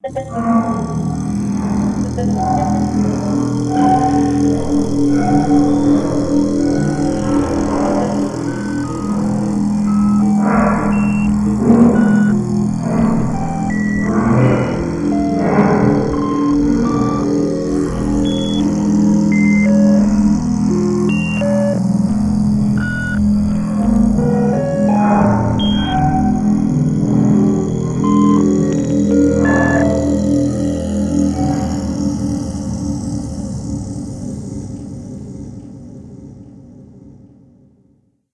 angry machine looking for you
Its dragging its gimp leg around the facility. Wires hang as it scrapes down the hall. You need a weapon, you need a way out. The facility is all under lock down. Who made this machine and why is it full of rage.
computer, robots, space